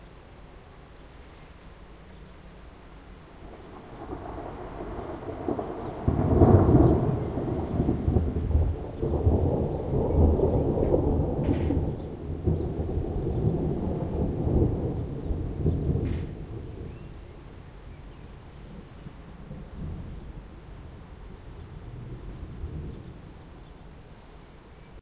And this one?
One of the thunderclaps during a thunderstorm that passed Amsterdam in the morning of the 10Th of July 2007. Recorded with an Edirol-cs15 mic. on my balcony plugged into an Edirol R09.
nature, rain, thunderclap, field-recording, thunderstorm, thunder, streetnoise